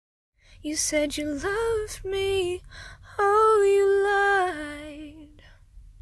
A female voice singing the lyrics'You said you loved me, oh, you lied'. Hopefully I'll be able to get the background noise cleaned again, as I can't do it myself.